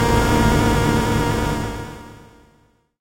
PPG 011 Dissonant Organ Chord E1
This sample is part of the "PPG
MULTISAMPLE 011 Dissonant Organ Chord" sample pack. It is a dissonant
chord with both low and high frequency pitches suitable for
experimental music. In the sample pack there are 16 samples evenly
spread across 5 octaves (C1 till C6). The note in the sample name (C, E
or G#) does not indicate the pitch of the sound but the key on my
keyboard. The sound was created on the PPG VSTi. After that normalising and fades where applied within Cubase SX.
dissonant, multisample, ppg